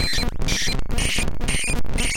I had a goal for this pack. I wanted to be able to provide raw resources for anyone who may be interested in either making noise or incorporating noisier elements into music or sound design. A secondary goal was to provide shorter samples for use. My goal was to keep much of this under 30 seconds and I’ve stuck well to that in this pack.
For me noise is liberating. It can be anything. I hope you find a use for this and I hope you may dip your toes into the waters of dissonance, noise, and experimentalism.
-Hew